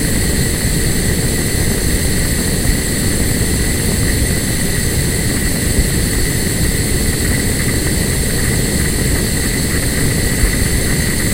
gasherd kleineflamme loop
little flame of a gas stove
gas, field-recording, stove, flame